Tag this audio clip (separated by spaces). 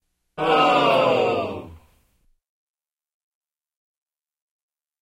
audience auditorium crowd group theatre